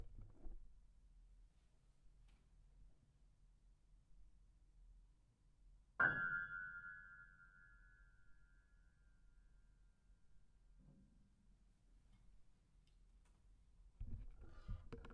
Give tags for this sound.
tone; pianotone

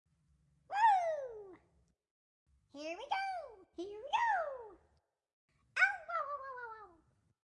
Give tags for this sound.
Kart; Toadstool; Nintendo; Mario; game; Toad

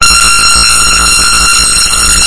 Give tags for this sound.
France; Pac; Soundscapes

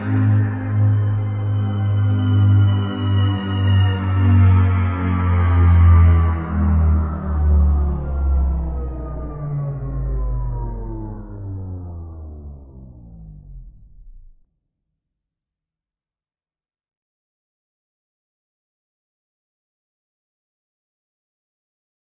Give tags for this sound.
effect; sci-fi

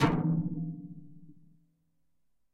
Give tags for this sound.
devoiced; layer

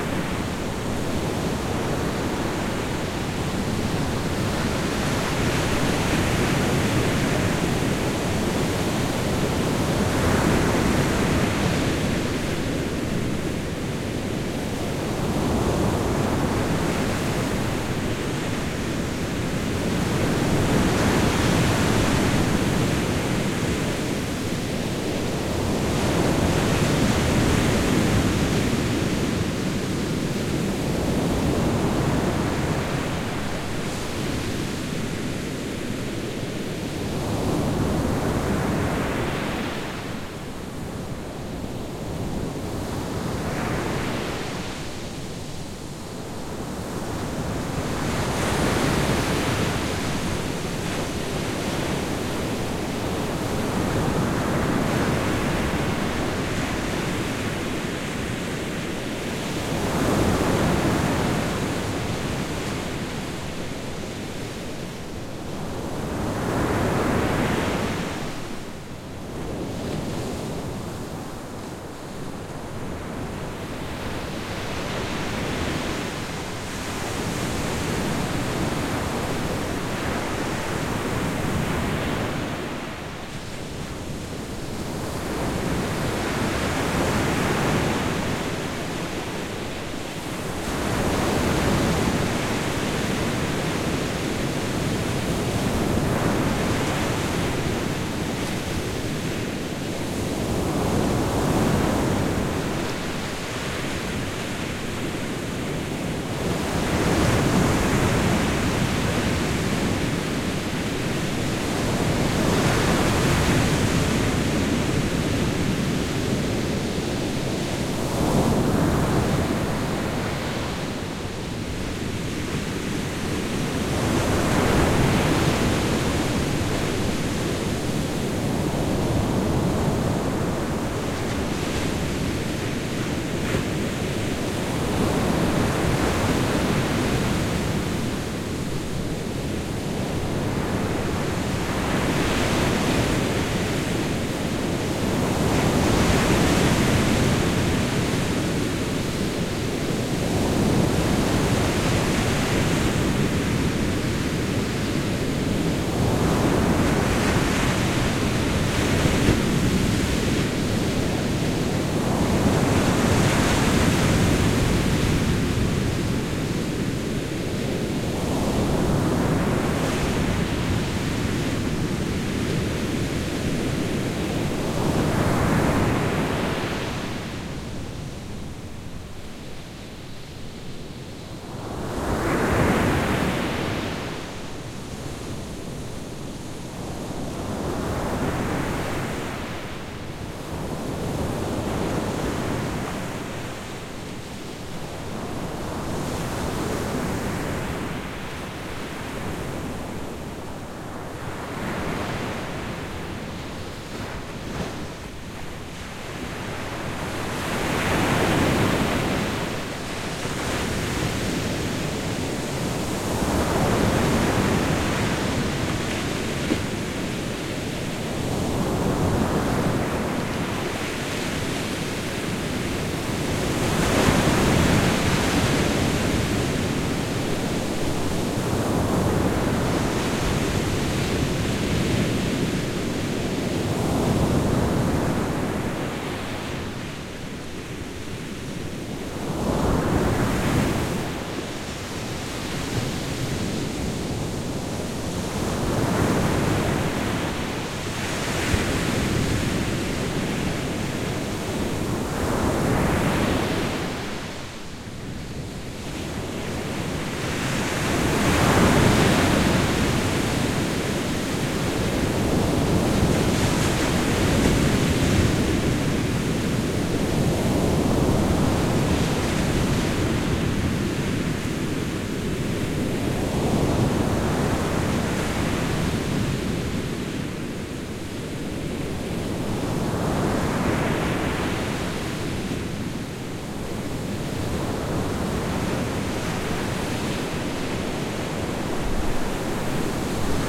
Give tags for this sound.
Beach; Greece; Sea; Waves

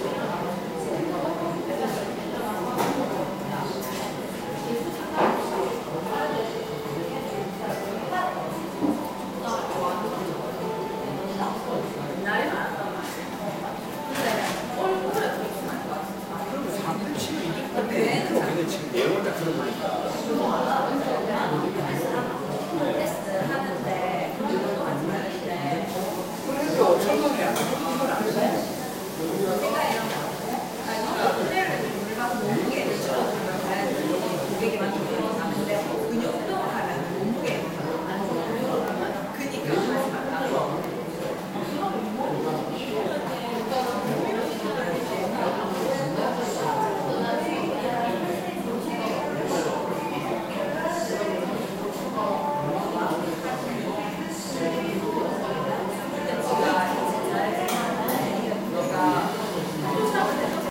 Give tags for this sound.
background; cafe-noise; noise; soundscape

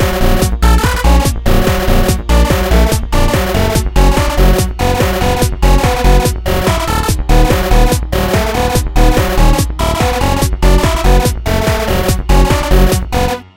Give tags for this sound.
flstudio; loop; techno

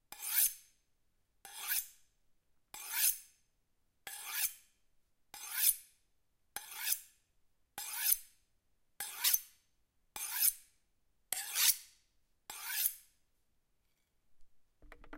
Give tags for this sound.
Cooking; Foley; Home; House; Household; Indoors; Kitchen; Percussion

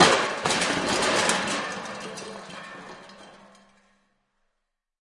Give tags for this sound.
bin; bottle; c42; c617; can; chaos; coke; container; crash; crush; cup; destroy; destruction; dispose; drop; empty; garbage; half; hit; impact; josephson; metal; metallic; npng; pail; plastic; rubbish; smash; speed; thud

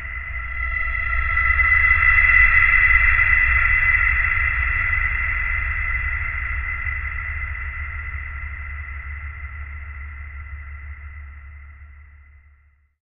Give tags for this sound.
drone; deep-space; long-reverb-tail; ambient

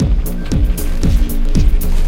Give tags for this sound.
04; 116

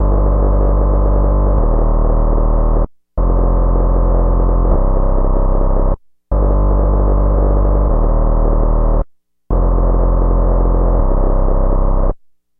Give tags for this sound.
bass; gritty; hard; lo-fi; noise; synthesizer

